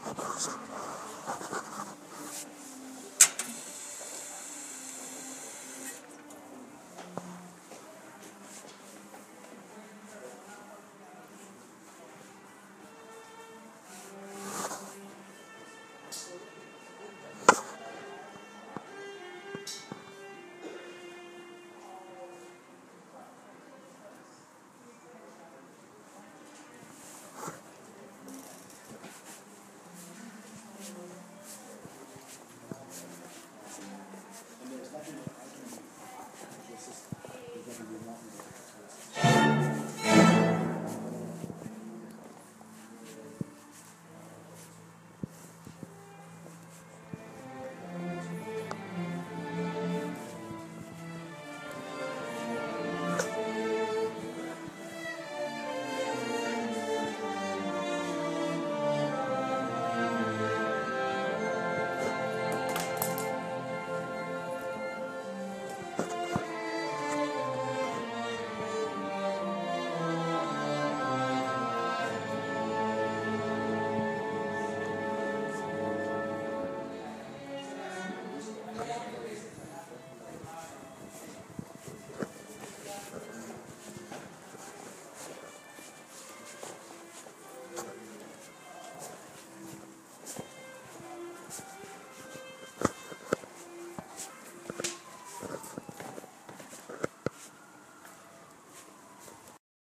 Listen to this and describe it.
This sound is recorded in crouse college in Syracuse university
ambience, crouse, mtc500-m002-s14